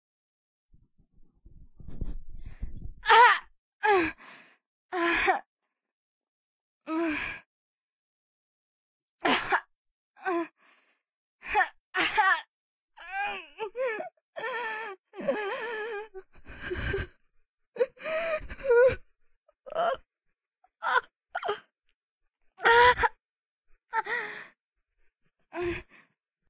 dying female
american, voice, grunt, vocal, moan, woman, die, dying, groan, english, pain, request, painful, death, female, hurt, girl, scream